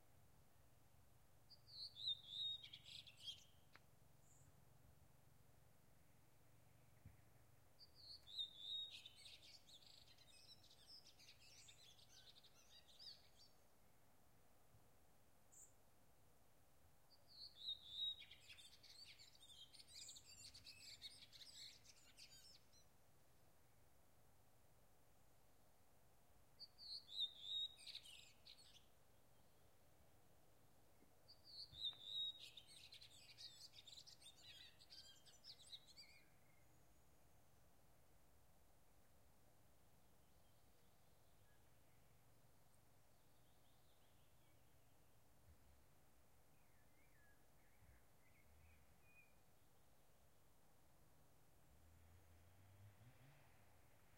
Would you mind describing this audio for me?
Nord Odal Nyhus 04 juni 2011 open forest birds insects wind through large pines distant traffic
Pine forest in Nord Odal small place north of Oslo, Norway. Distant traffic can be heard in the background.
birds, filed-recording, forest, insects